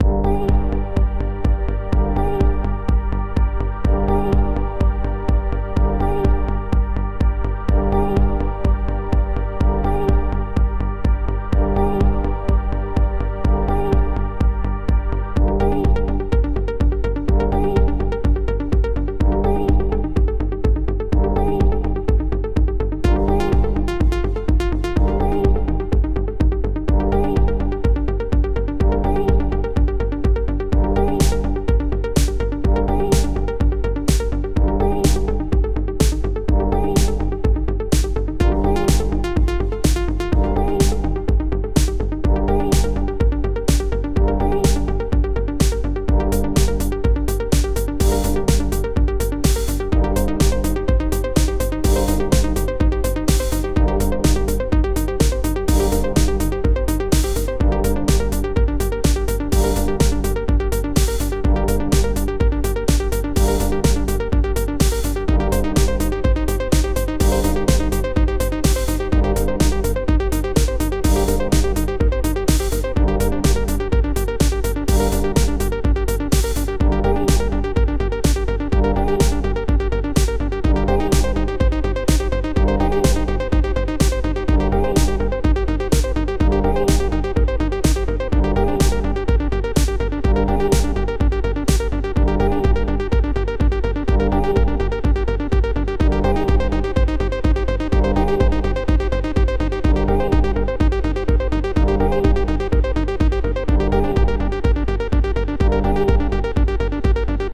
Axon 02 - Techno track loop
Synths : Ableton live,Silenth1,Kontakt,BIGROOMKIXSYNTH V1-1,bizunevst,shuniji.